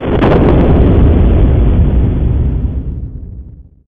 This is sound of Big Explosion.
It is created using "Draw Tool" and various effects in Audacity.
You can use this sound in any game where there is various explosive means. For example, mods for Doom.